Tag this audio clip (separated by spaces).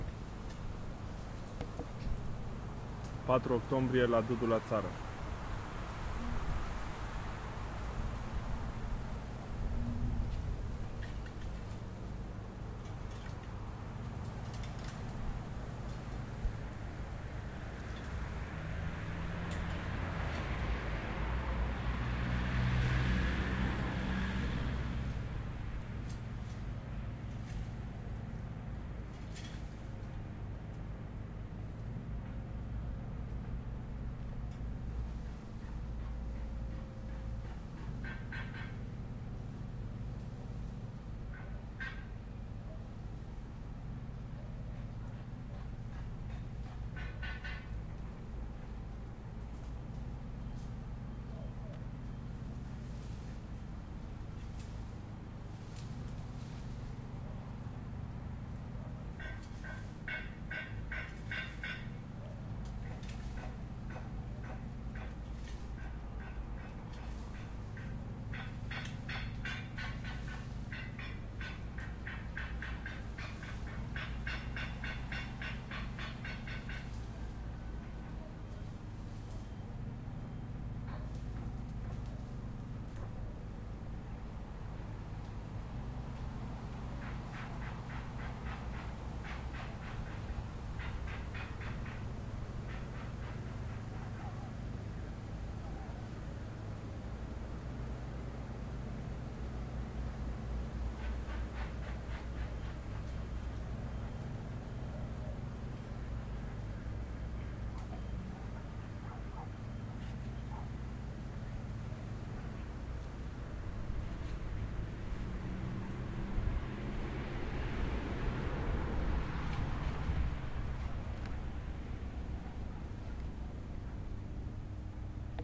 ambience atmo rural atmosphere